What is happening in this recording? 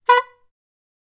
Horn Toy (Claun like sound) 4
Simple recording of a toy horn. I believe that I recorded it with tascam dr-05. I don't remember exactly, if I'm right, that was long time ago.
No additional editing or processing.